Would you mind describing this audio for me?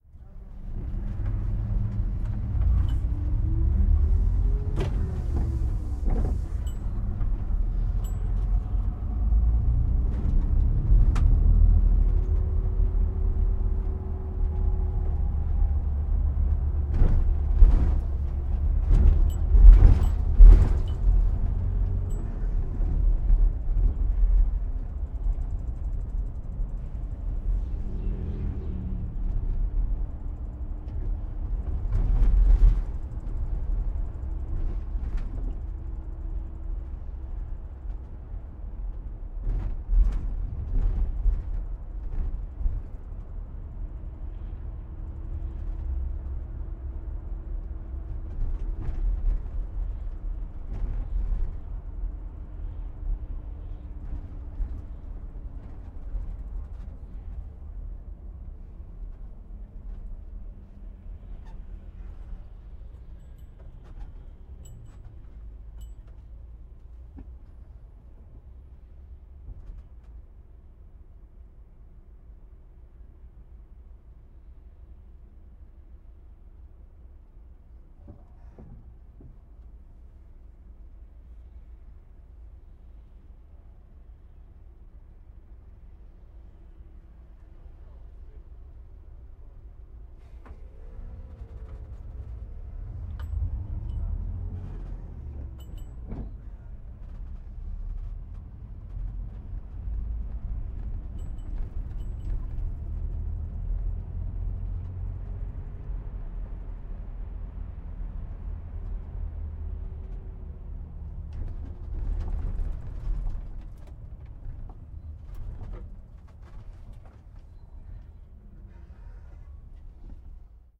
Tourist Bus Internal 02
Bus, Internal, Tourist